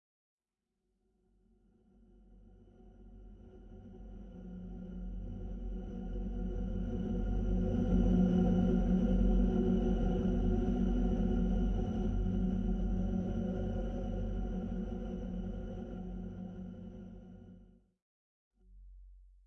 This sound were made by recording the feet of a tightrope artist rubbing against the wire. (AKG contact mic coming directly into a MAX/MSP patch. Other sounds to be added to the pack are physical models with the dimensions of a 7 meter tightrope exited by other impulse samples. Chorus and transposition of the original choruswire samp. Enjoy.:))